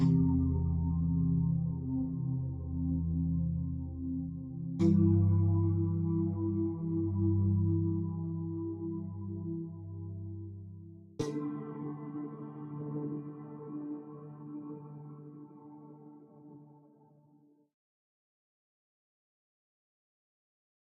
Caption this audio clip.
Hypo-Strings-150bpm
Low-budget Synth-String loop.
Nothing special.
FL Studio - Sytrus.
11. 12. 2015.
electronic, loop, string, synth, synthesizer